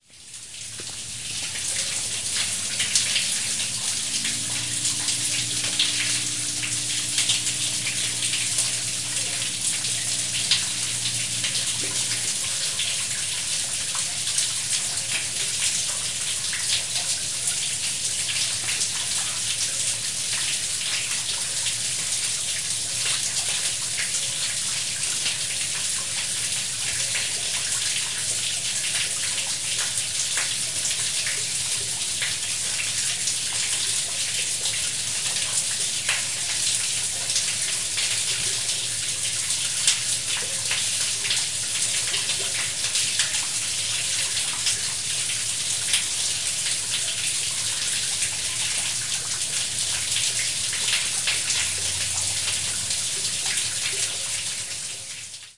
Snowmelt water pouring into a storm drain on a cold day
in Mid-December.
Recorded with my handy Zoom H4N recorder with its internal microphones.
An amazing recording with a lot of echoing water...